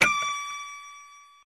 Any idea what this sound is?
perc horn 2
the remixed samples / sounds used to create "wear your badge with pride, young man".
as suggested by Bram
impresora, short, printer, percussion